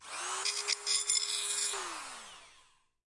Pretty fast engraving process sound (for the game, I guess?). dethrok recorded the sound of engraving tool on metal, I just shortened it to 3s.
engraver, engraving, grinder, grinding, machine, sfx, short, tool